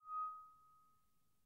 Glass Screech
Sound made by swiping finger over the edge of a wine glass.